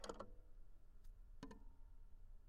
Toy records#99-K05
Complete Toy Piano samples.
Keys pressing and releasing sounds.
sample, toy, piano, keyboard, note, toypiano, samples, instrument